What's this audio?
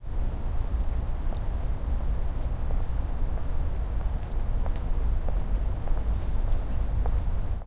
0053 Birds and footsteps
Birds and footsteps. Background noise
20120116
birds, field-recording, footsteps, korea, seoul